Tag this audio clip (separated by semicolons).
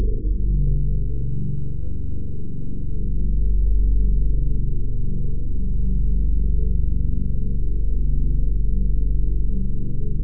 meteor; fl3p4; drone; rp3; valar; spaceship; space; lab; underground